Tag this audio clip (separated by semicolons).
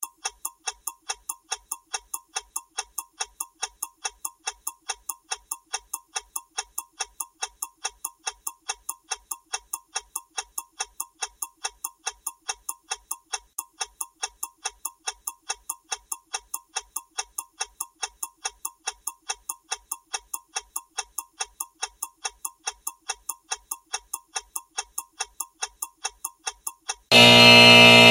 buzzer; clock; quiz; timer; alert; ticking